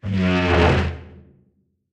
chair dragon sigh
Processed recordings of dragon a chair across a wooden floor.